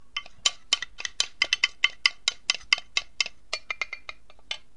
Mixing food wooden spoon
bowl, ceramic, cooking, dry-contents, environmental-sounds-research, food, mixing, spoon, wooden